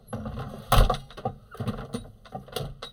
Door Closes Fast
Door being closed quickly.